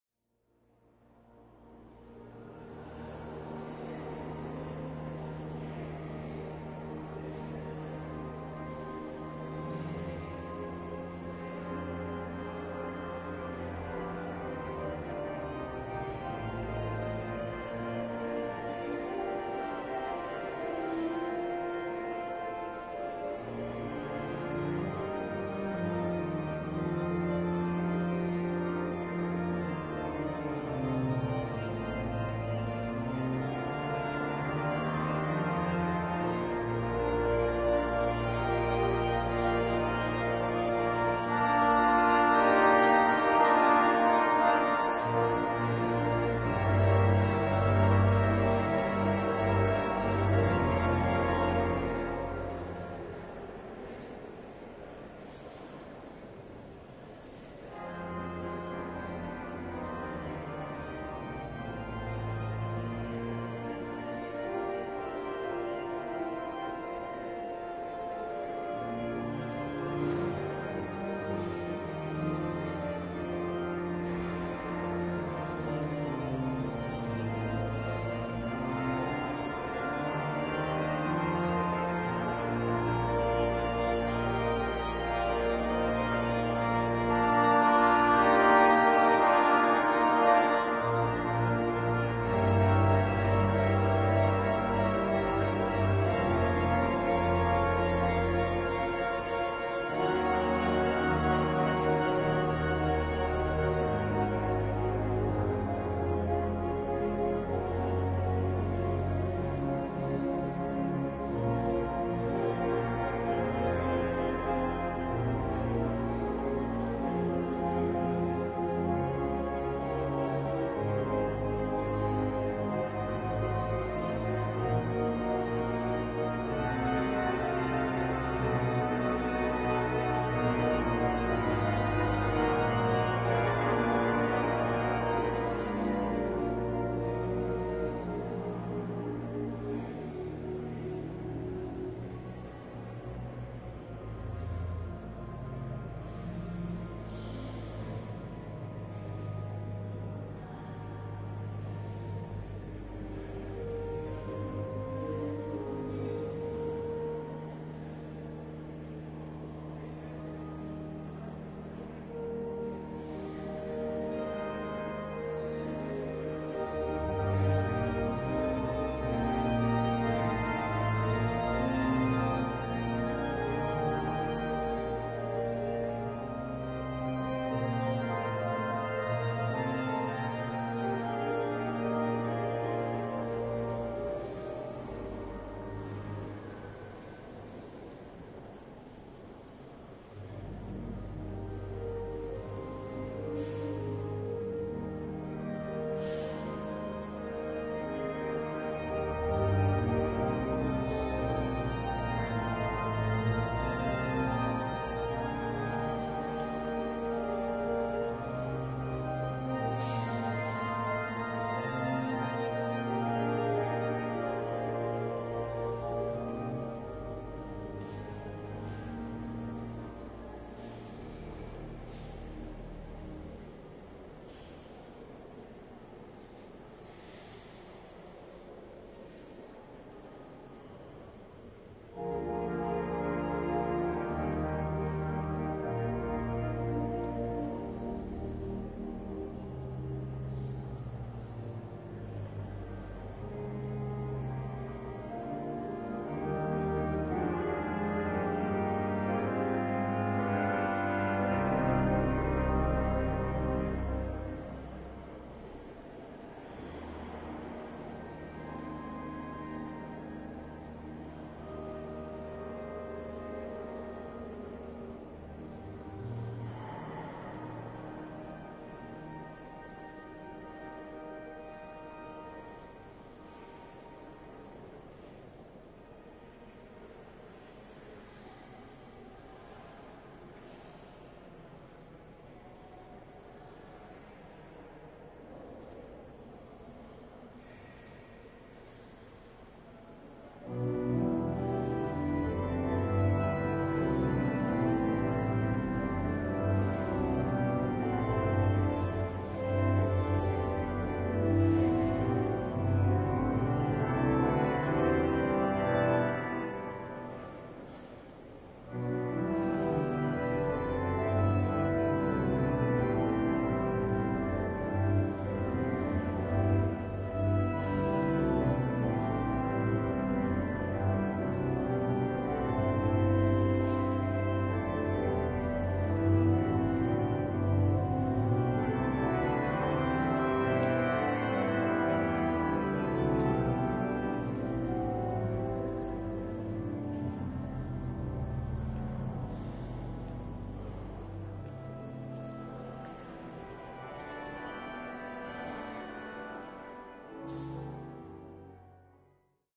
Cathedral Ambience 1 (MS Stereo)
Winchester Cathedral ambience. Recorded in MS and XY Stereo (combined for 4 channel surround)
Distant visitors walking and talking and a organ playing in the foreground.
Ambience, Cathedral, Church, Organ